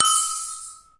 Notification Signal
A slight indication that something interesting is happening somewhere else in a fantasy map setting, probably for games.
chimes bar by urupin
game-design, gui, information, location, notification, position